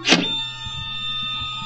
Mechanical sound of a Kodak printer.